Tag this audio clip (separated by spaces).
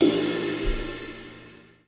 hi-hat cymbal metronome TRX hit one-shot click Istambul Cooper Bosphorus wenge metal drumset drum one bubinga snare wood crash cymbals bronze shot ride custom